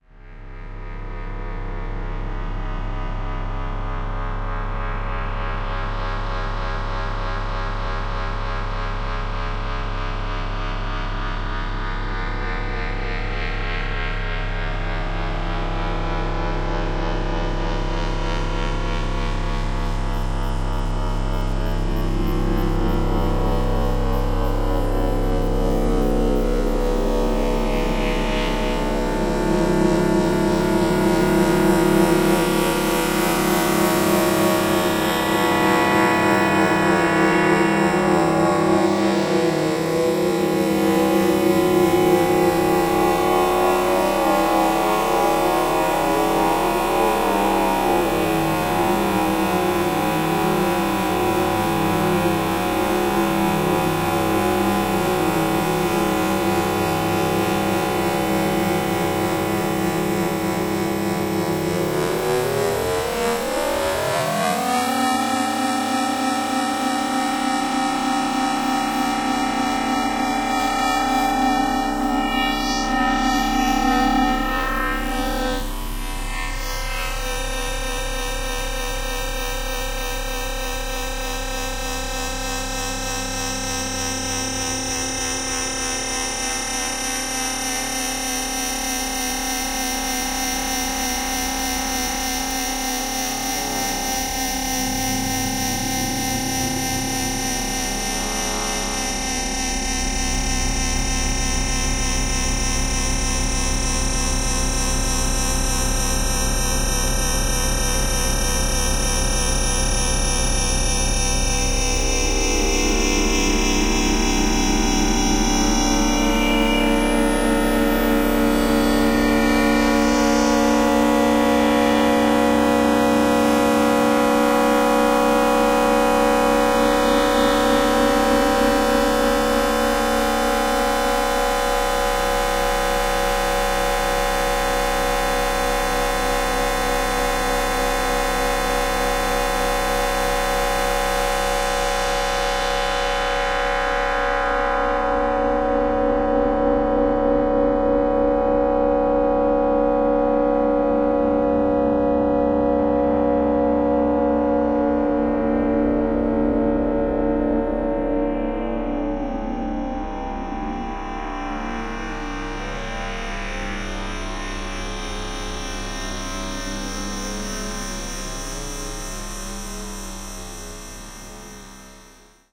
Time Nightmares - 08
Time dilation dilated into concave ambient drone washes.
abstract, ambiance, ambience, ambient, atmosphere, atonal, dark, deep, digital, distorted, drone, effect, future, fx, horror, industrial, nightmare, pulsating, pulsing, sci-fi, sfx, sound, sound-design, sound-effect, soundeffect, space, spacey, stretch, synth, time